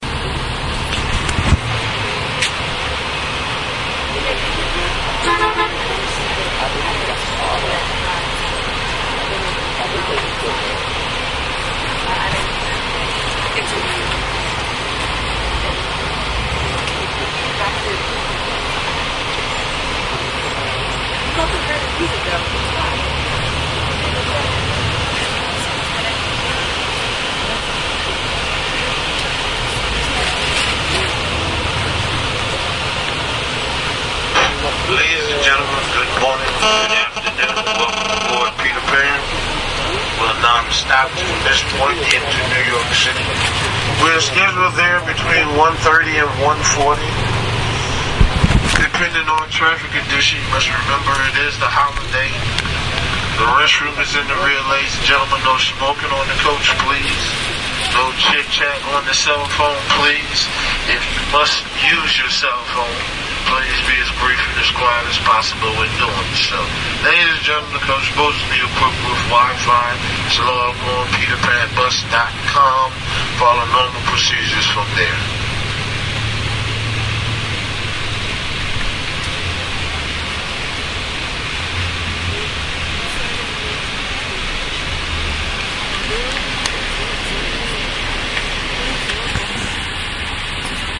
Field recording inside a bus, with the hum of an air conditioner.
announcement, bus, air, field-recording, conditioner, moving